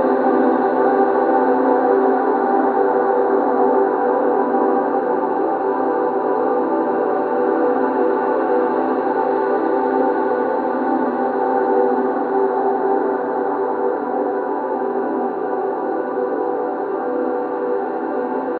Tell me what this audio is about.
Analogue Pt1 22-1
processed with an analogue valve eq chaining two mono channels
and narrow Q settings to catch the vocalesque frequencies
prophet08, vocal-pad